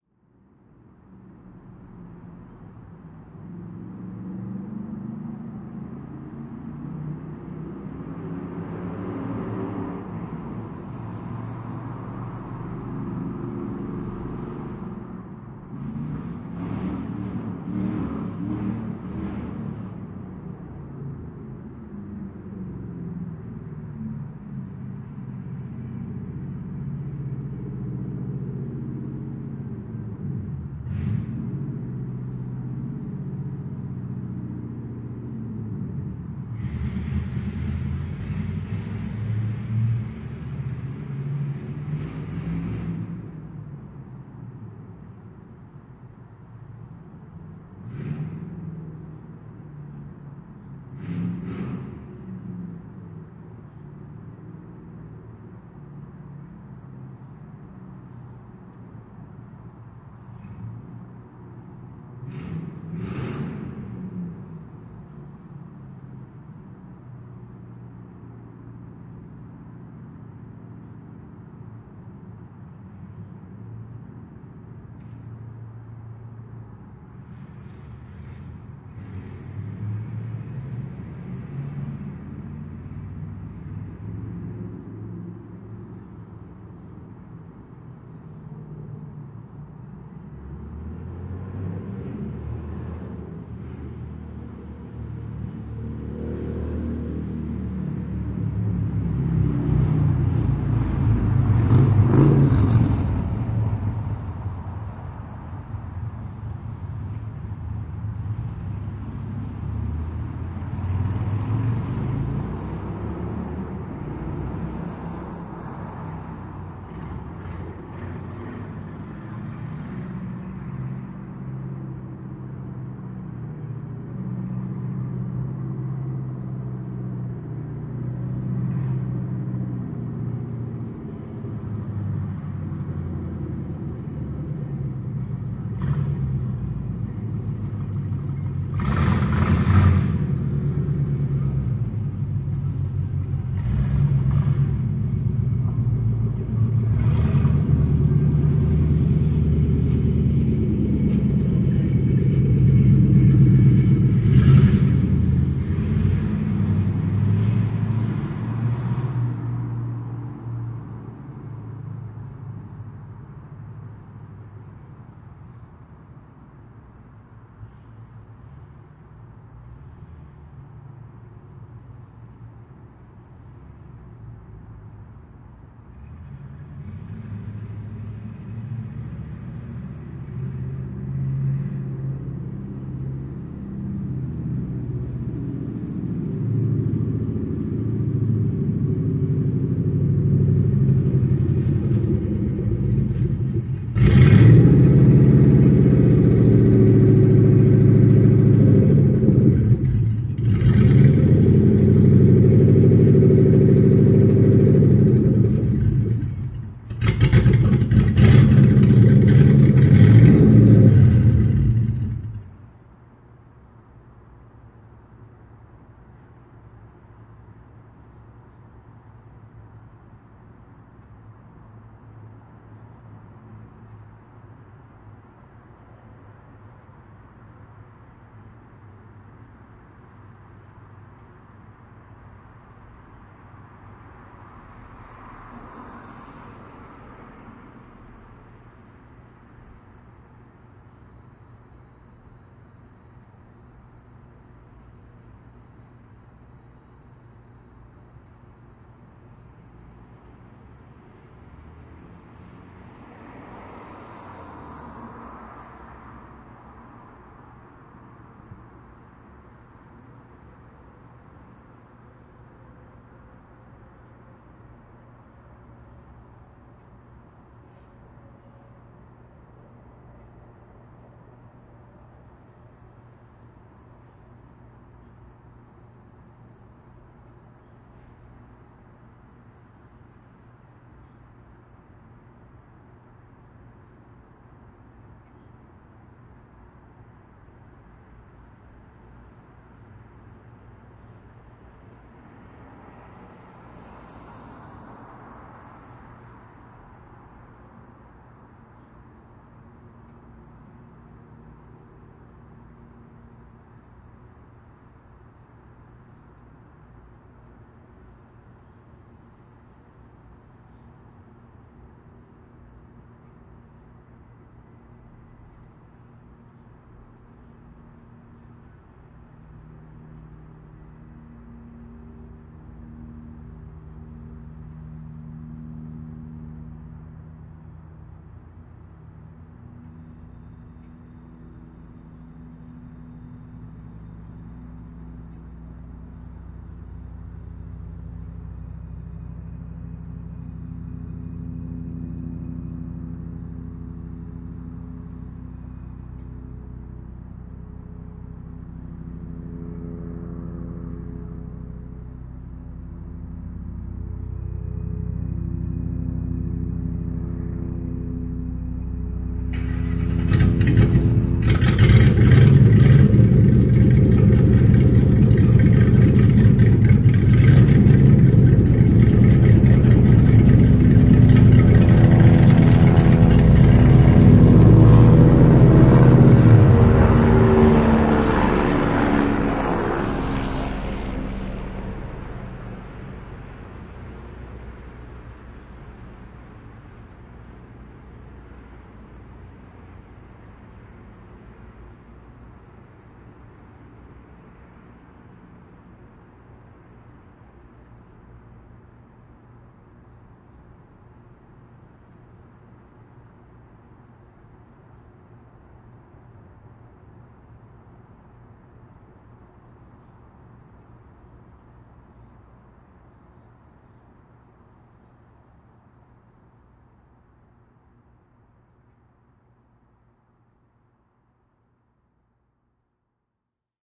VEHCar loud car driving and parking TK SASSMKH8020
A car with a loud exhaust drives through my neighborhood, then parks under my window, revs the engine for a bit then stops. Then starts up again for a bit before turning the car off again.
Microphones: Sennheiser MKH 8020 in SASS
Recorder: Zaxcom Maaxx
automobile, car, city, engine, exhaust, field-recording, loud, outside, start, urban, vehicle, vroom